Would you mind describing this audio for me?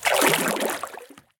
Water Paddle med 013
Part of a collection of sounds of paddle strokes in the water, a series ranging from soft to heavy.
Recorded with a Zoom h4 in Okanagan, BC.
boat, field-recording, lake, paddle, river, splash, water, zoomh4